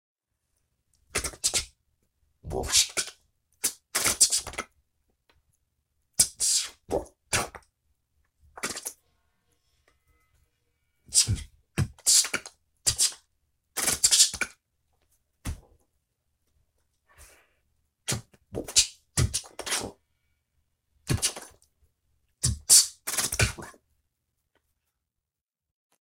2 of 3 insectoid talking sounds I did on my phone after watching a half decent sci-fi anime with insectoids in it but didn't have a good enough talking sequence imho. •√π¶∆°¥
bug, clicking, creature, fx, gabber, insect, Insectoid, monster, mutant, sci-fi, space, weird